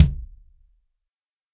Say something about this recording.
Toms and kicks recorded in stereo from a variety of kits.